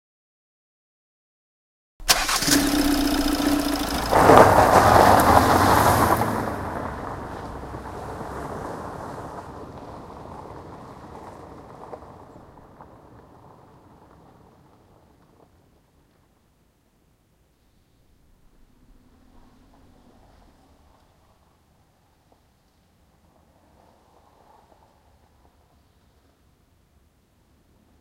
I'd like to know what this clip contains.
Diesel engine is started and after that the car throttles away.
throttle, engine, start, car, gravel, diesel